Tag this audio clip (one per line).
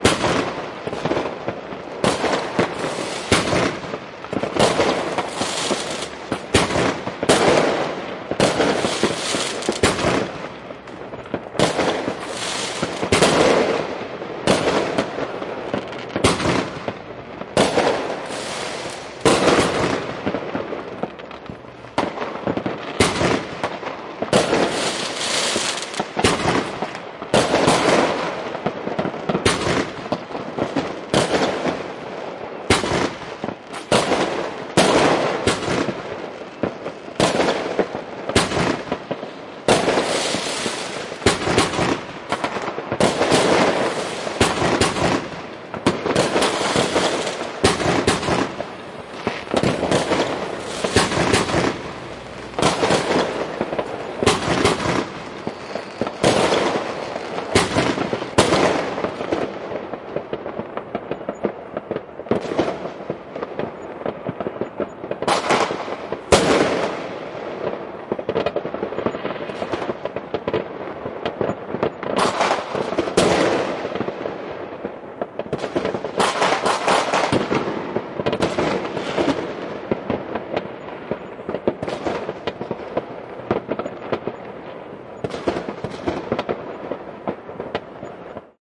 bang
banger
bomb
boom
celebration
explosion
feuerwerk
fire-crackers
firecrackers
firework
fireworks
happy
lights
new-year
new-years-eve
night
party
rocket
rockets
silvester
year